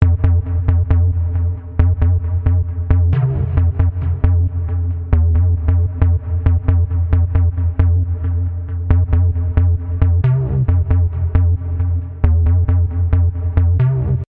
boom bass loop 135 1
acid, bass, bassline, booming, buzz, dub, electro, loop